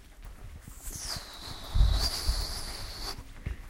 sonicsnaps LBFR Leslia,Maurine
Here are the recordings after a hunting sounds made in all the school. Trying to find the source of the sound, the place where it was recorded...
Binquenais, sonicsnaps, La, Rennes